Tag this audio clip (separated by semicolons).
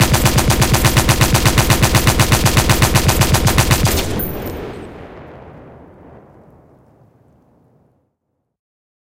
army; attack; fire; firing; fps; gun; live-fire; machine; military; pistol; rifle; shooting; shot; sniper; soldier; war; warfare; weapon